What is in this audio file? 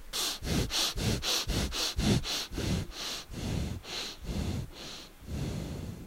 Respi calma
Breathe calm down
breathing, calm, respiraci